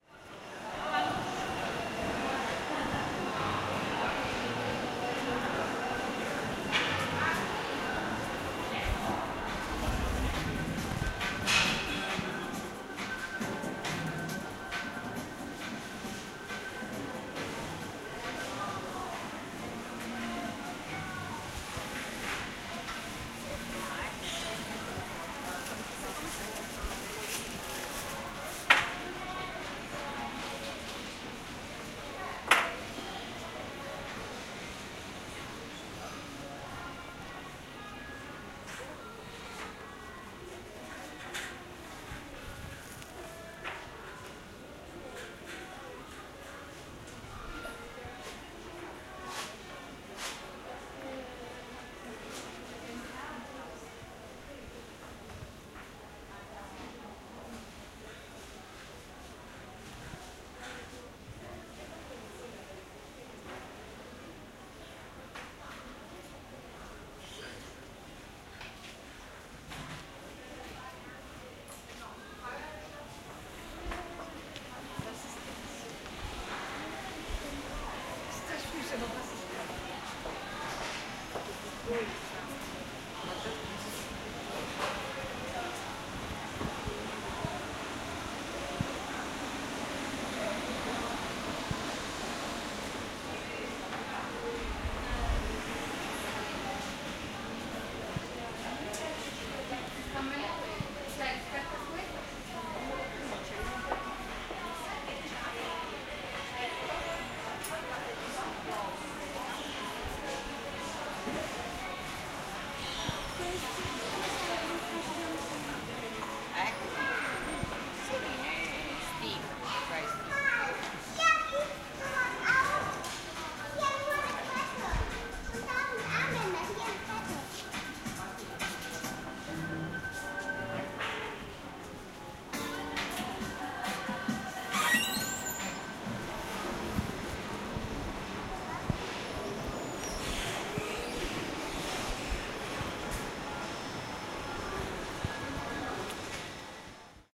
0403201221 entrata-passaggio-uscita da un grande magazziono
20 mar 2004 12:21 - One minute walk in a department store:
00:00 street (via calzaioli - large pedestrian street in the centre of Florence, Italy)
00:10 music from the loudspeaker at the entrance of the department store
00:22 go to the department store - different music inside
voices of persons
01:02 fan coil
02:00 child voice - go towards entrance
02:02 again entrance music
02:15 the door - outside in the street.
child, department, department-store, door, field-recording, firenze, florence, loudspeaker-music, persons, store, voice, voices